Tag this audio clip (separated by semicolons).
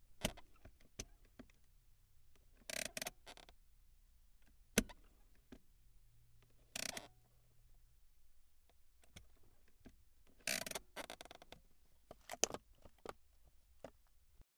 parking; civic; brake